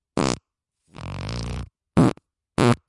Zoom H1n fart